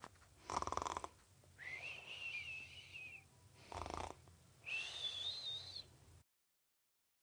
persona que ronca y silva mientras duerme
dormir, roncar, silvar